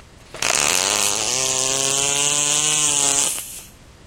fart poot gas flatulence flatulation explosion noise weird